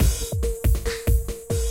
140 bpm break beat drum loop